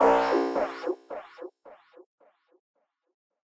140 BPM Comb Spring FX Delay - Created with iZotope Iris and based on a clap sound
This sample was created while playing around with spectral editing using iZotope Iris and is based on a clap sound. The atmomsphere created is in the name of the file.